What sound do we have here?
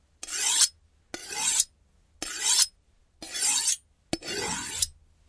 Knife Sharpen Slow
Felix Solingen Chef's knife (blade 23 cm, 9") being sharpened on a Wusthof-Trident steel.
blade, felix-solingen, foley, kitchen, knife, metal, scrape, sharpen, sharpening, steel, stereo, wusthof-trident